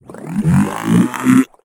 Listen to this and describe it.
A synthetic voice sound effect useful for a somewhat confused robot to give your game extra depth and awesomeness - perfect for futuristic and sci-fi games.